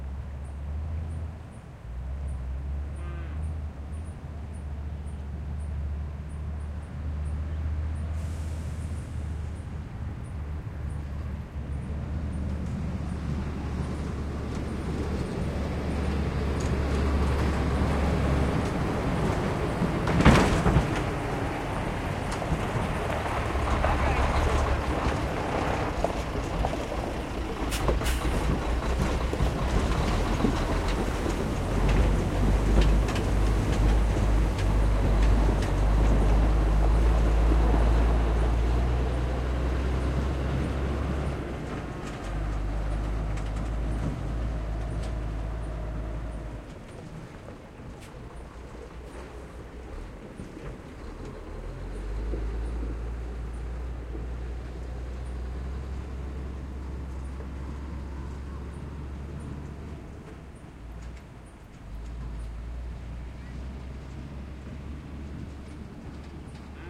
river barge or big truck machinery pass by close metal hit link up
pass, truck, machinery, barge, river